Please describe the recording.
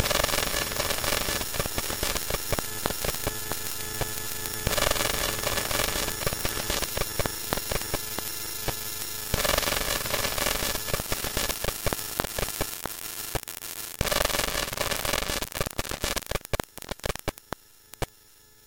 Sounds made by an unearthly creature... All sounds were synthesized from scratch.